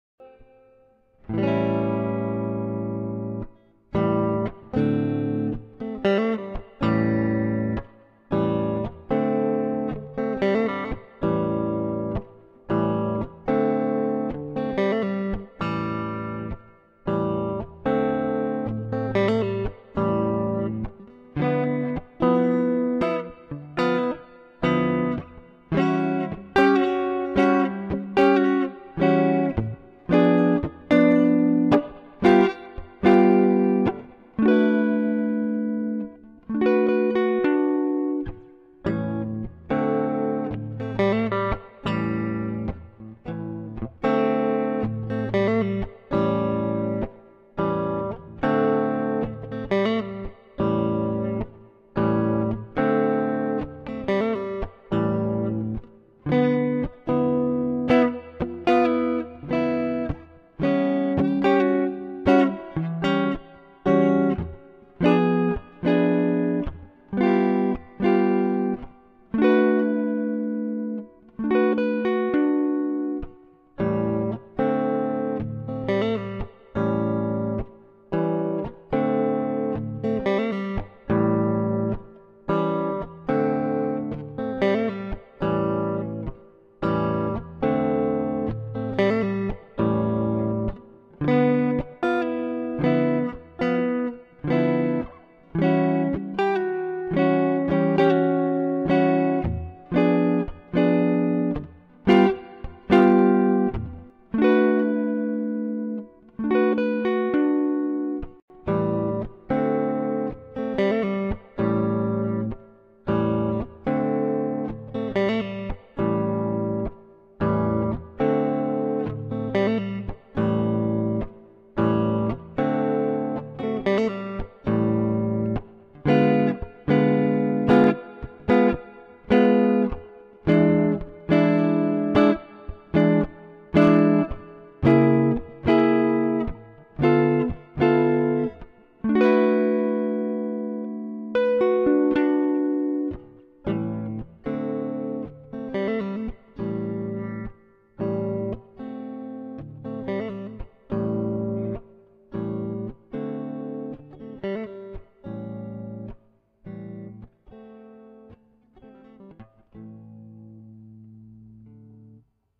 This is electroguitar instrumental composition, record through Cubace, where i played syncopation seventh-chords in clean tone whith using reverb (Electro-Harmonix holy grail plus) guitar pedal. Record in Cubase, through "presonus inspire 1394". Melodic.
Chords, clean, echo, electric-guitar, electroguitar, experimental, Jazz, melodic, melodical, music, reverb, reverberation, sample, seventh-chords, song, syncopation, tune